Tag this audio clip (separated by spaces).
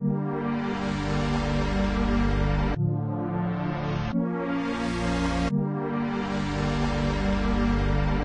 atmos background-sound soundscape suspense atmospheric horror white-noise music score ambience atmosphere intro